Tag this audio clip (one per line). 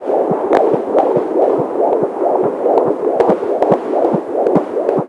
baby
field-recording
heartbeat
doppler